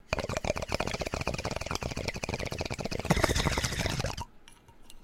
Bong Hit
Genuine hit from the bong -.-
smoking, fire, bubbles, lighter, bubble, bubbly, smoke, bong, glass, hit